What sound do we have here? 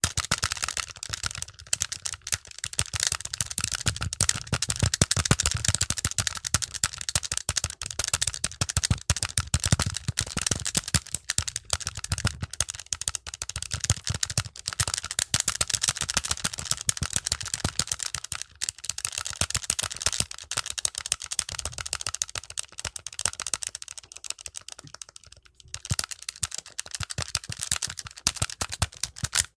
Recorded me bashing on the buttons of my SNES controller, as there weren't really any sound effects on here that captured the kind of button mashing I wanted, so I did my own!